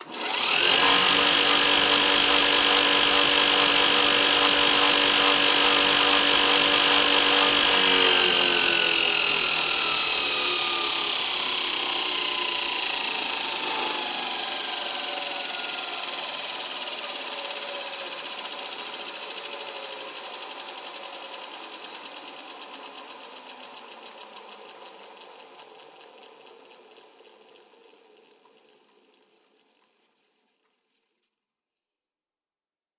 Belt grinder - Arboga - On run off
Arboga belt grinder turned on, running freely and turned off.
crafts, labor, concrete-music, belt-grinder, arboga, metalwork, machine, 80bpm, work, tools, 11bar